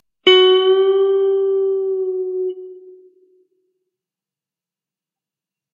clean guitar bend
Note bend with a Gibson Les Paul guitar plugged onto a Marshall amplifier with some reverberation.
bend, clean, guitar, reverb